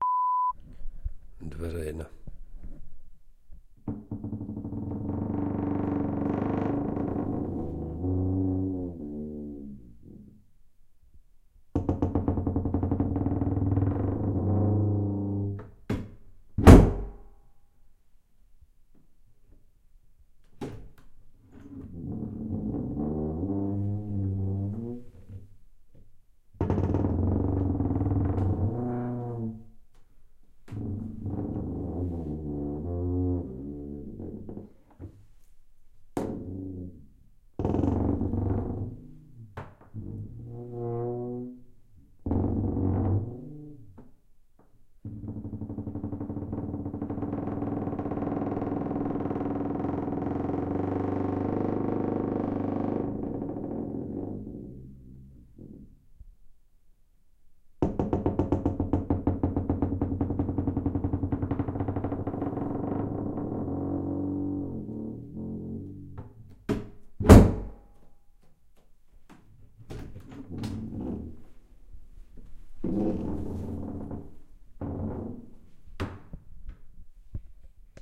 Not well oiled door